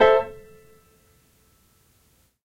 Tape Piano 28
Lo-fi tape samples at your disposal.
collab-2, Jordan-Mills, lo-fi, lofi, mojomills, piano, tape, vintage